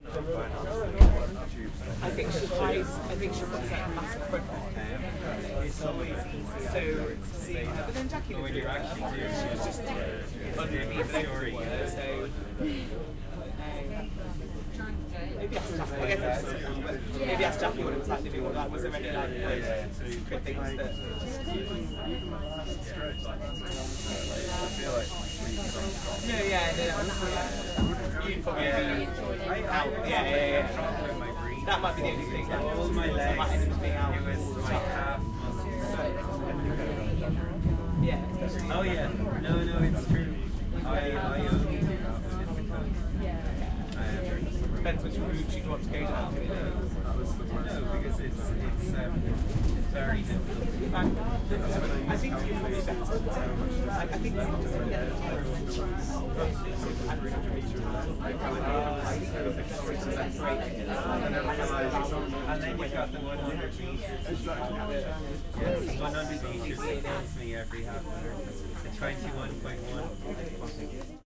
Inside a busy chattery train
Dublin 2018